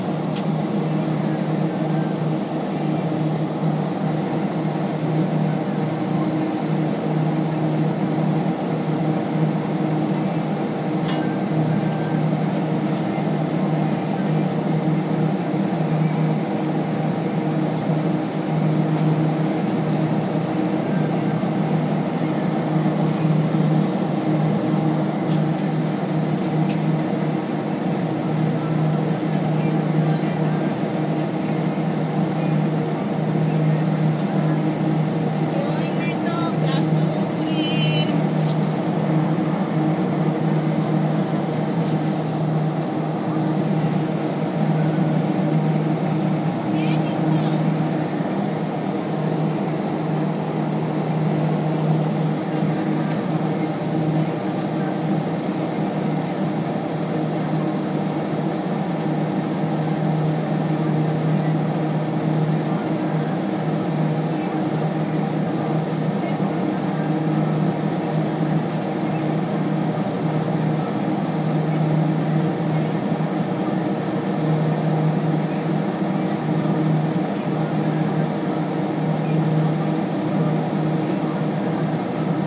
Grocery store freezer section
Freezer section at a grocery store
cold, fan, freezer, fridge, frozen, grocery, hum, ice, refrigerator, store